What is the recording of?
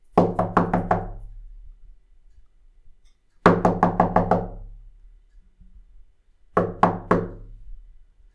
Knocking on door.
door, knocking-door, knocking-on-door, knock, knocking